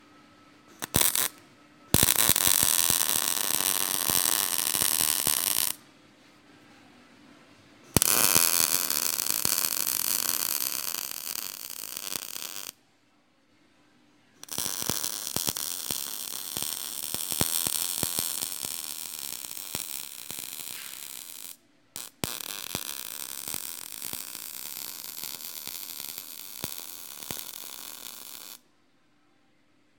Welding MIG metal light
MIG welding process